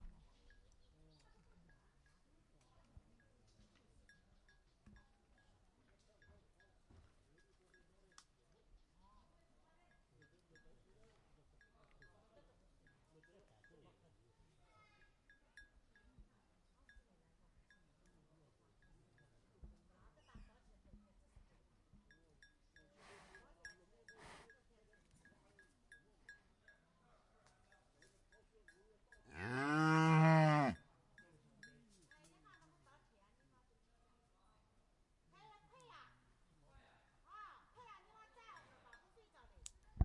cattle brethe
in a mountainous area there is only the old cow
cow, moo